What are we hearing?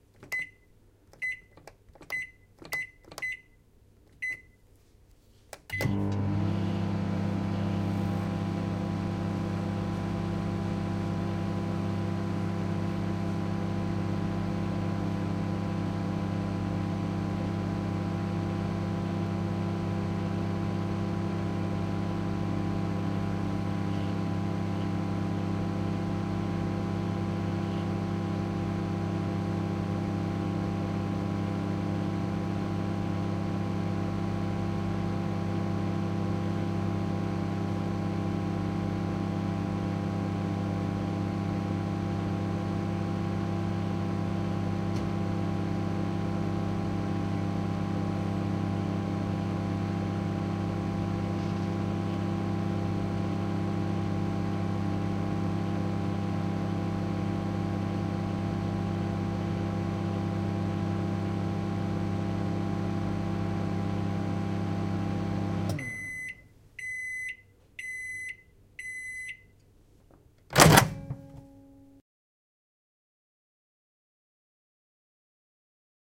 Microwave switched on, runs, beeps and opened
cooking, kitchen, microwave